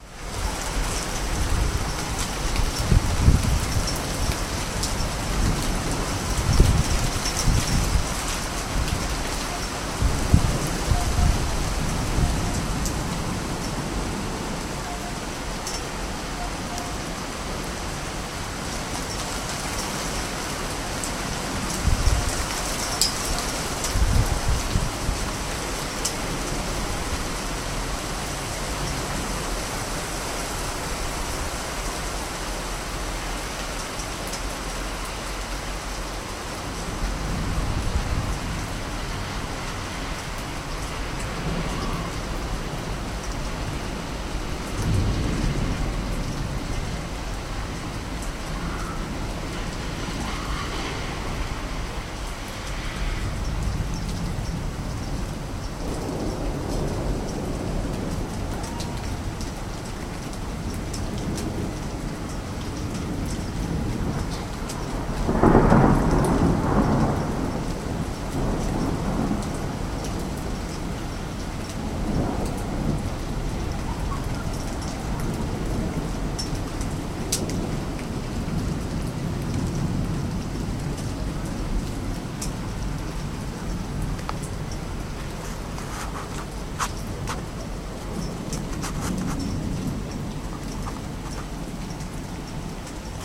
VN860880 storm lightning
flood, lightning, storm